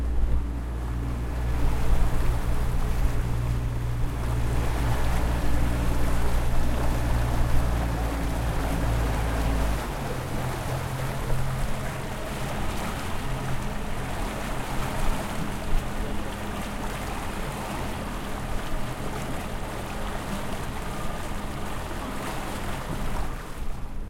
Boat sailing on the sea, at different speeds. Turn off the engine at the end. Sound recorded from it.
boat, engine, lancha, mar, motor, sea